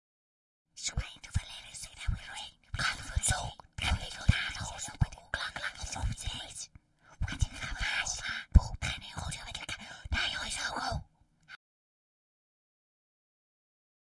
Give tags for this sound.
fantasy
whispers

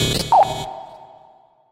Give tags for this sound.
glitch
game
effect
processed
pc
electronic
video
random

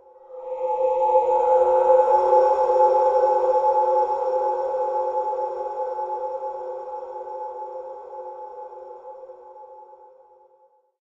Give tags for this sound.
ambient
deep-space
drone
long-reverb-tail

